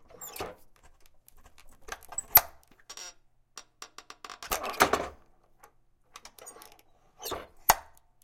wood stove latch
opening a wood stove.